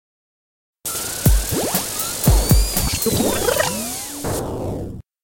Rewindy with beat
bumper, imaging, radio, sting, wipe